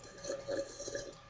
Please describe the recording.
battery low
This sound is part of my windows sounds pack. Most sounds are metaphors for the events on the screen, for example a new mail is announced by the sound of pulling a letter out of an envelope. All sounds recorded with my laptop mic.
battery-low, metaphor, recorded, vista, windows, xp